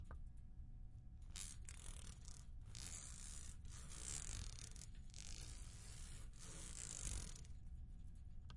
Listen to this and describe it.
beads trace yes
beads, noise